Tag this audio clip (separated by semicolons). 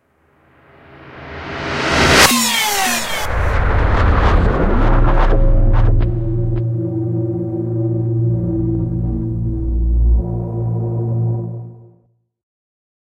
abstract; atmosphere; background; cinematic; dark; destruction; drone; futuristic; game; glitch; hit; horror; impact; metal; metalic; morph; moves; noise; opening; rise; scary; Sci-fi; stinger; transformation; transformer; transition; woosh